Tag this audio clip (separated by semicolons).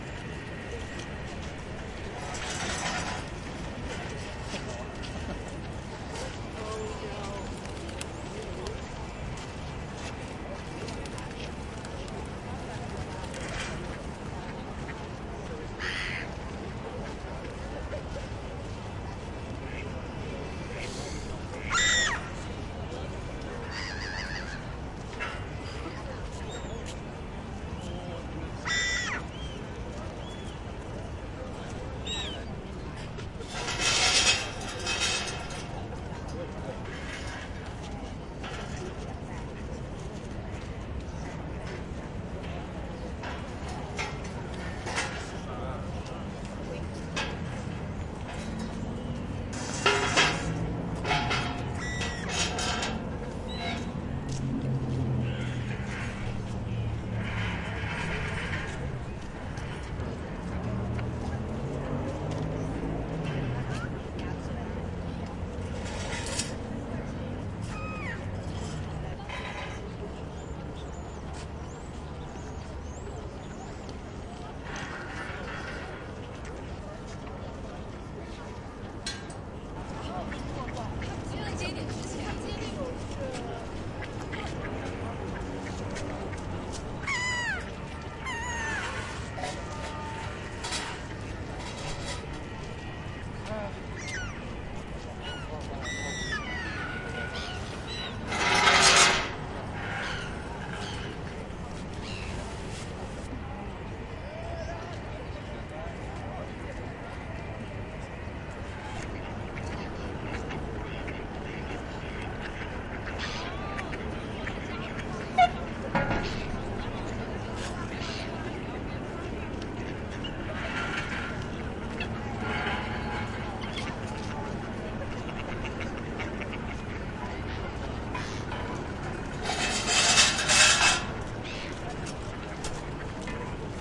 paris; bird; birds; chair; ambience; field-recording; Tuileries; garden